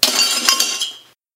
Breaking Glass 1
Taking a whack at an old glass jar with a hammer.